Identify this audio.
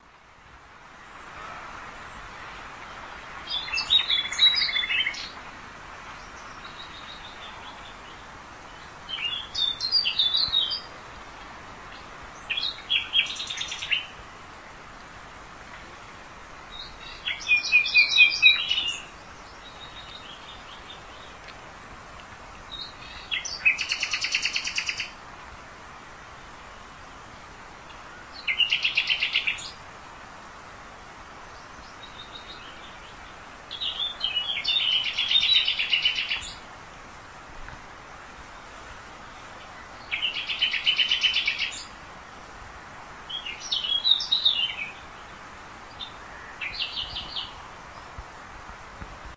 birds oldstadium2 120510
12.05.10: about 20.00. the old stadium, Wilda district, Poznan/poland. The sound of singing birds, in the background the city noise.
birds, center, city-noise, field-recording, old-stadium, poland, poznan, wilda